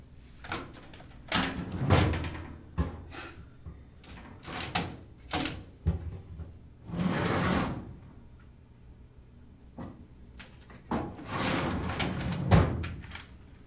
file cabinet metal open close drawer nearby roomy
metal, close, open, drawer, cabinet